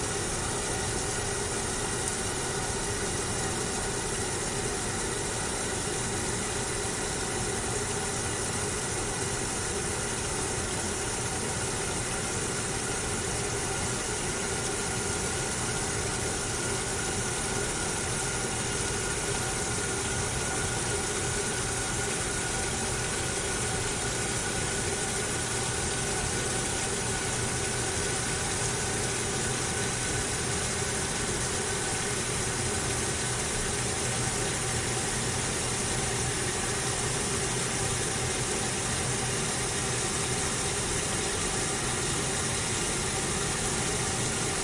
laundromat washers washing machines close rinse3
close, laundromat, machines, rinse, washing